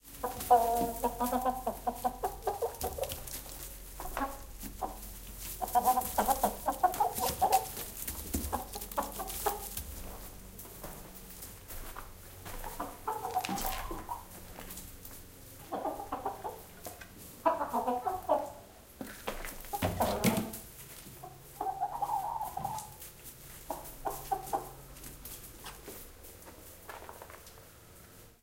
Sound of some chicken. They are moving around in a closed space.
Animals, Campus-Gutenberg